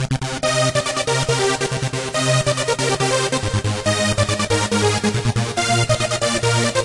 A sequence created with f.l. studio 6 the synth has a delay and arp effect on it.